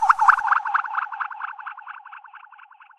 reinsamba Nightingale song dublostinspace4-rwrk
reinsamba made. the birdsong was slowdown, sliced, edited, reverbered and processed with and a soft touch of tape delay.
ambient, animal, bird, delay, echo, electronic, funny, happy, nightingale, reggae, reverb